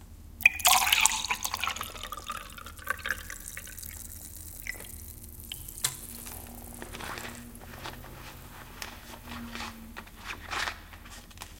i'm pouring a glass of water. recorded with Rode NT5 through Yamaha MG12/4 to Peak LE5.
sparkling, pure, cave, pellegrino, mineral, water